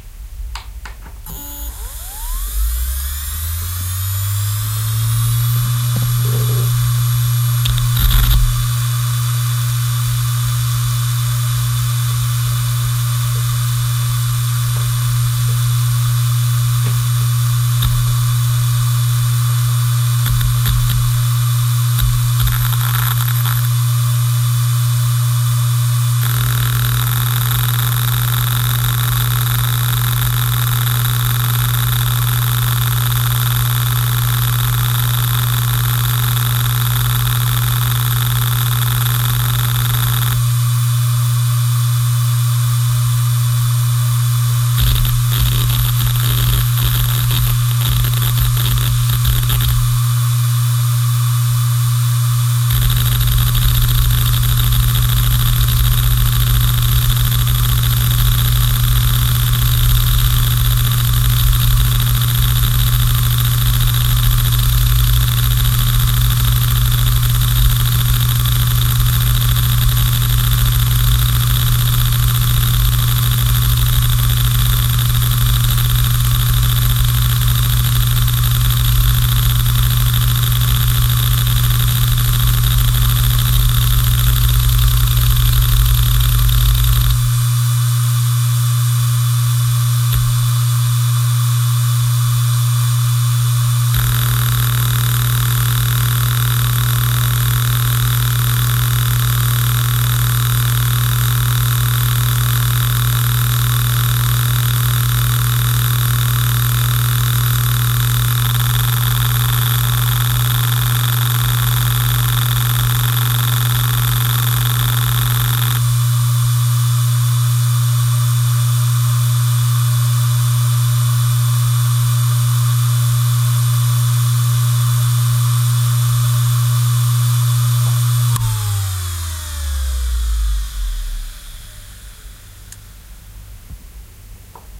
hard disk drive (Seagate, 1999)
Sounds of an old hard disk drive from 1999 (Seagate, Model ST314220A, 14,2 GB). You hear starting of the spinning noise and the heads rumbling when data is read and written. I started a short defragmenting to make the disk noisy.
Recorded with a Roland R-05
computer, defragmenting, disk, drive, hard, hdd, machine, motor, noise, rattle